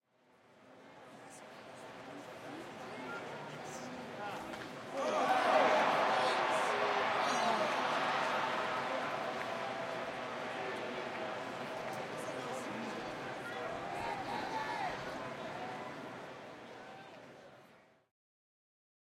WALLA Ballpark Applause Short 03
This was recorded at the Rangers Ballpark in Arlington on the ZOOM H2.
ballpark, baseball, cheering, clapping, crowd, field-recording, walla